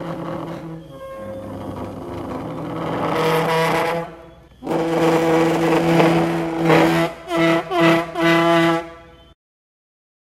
Silla siendo arrastrada
chair, dragging, floor, furniture, squeaky